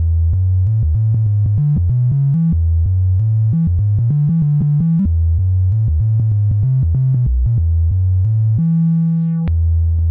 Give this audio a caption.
Bass Chaos - 4 bar - 95 BPM (swing)
bass, electronic, fruityloops, hip-hop, hiphop, loop, synth